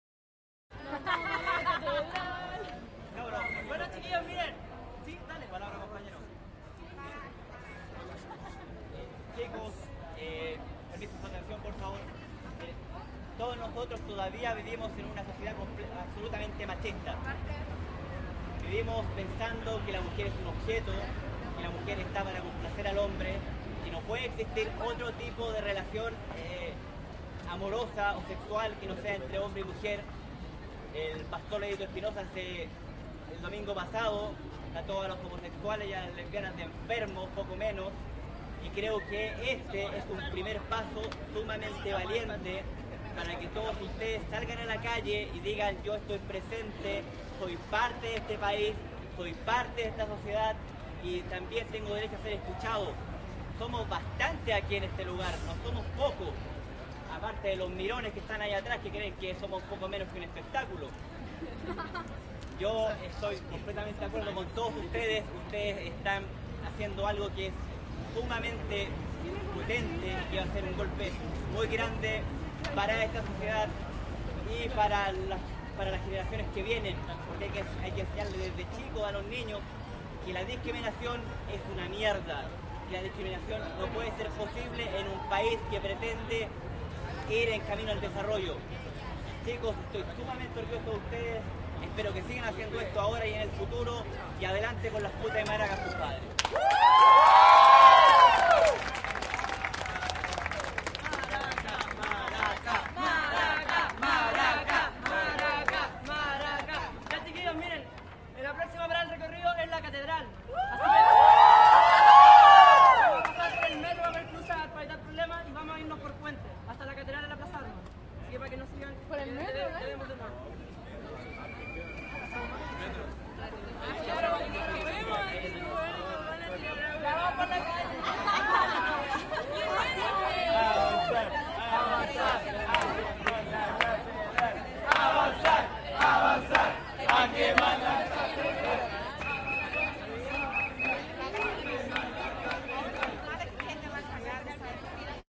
marcha de las putas y maracas 14 - palabras de un concurrente
Un participante opina sobre la sociedad y luego se indican los siguientes pasos de la marcha: avanzar a quemar la catedral.
calle, chile, crowd, gritos, leonor, maracas, marcha, protest, protesta, putas, santiago, silvestri, street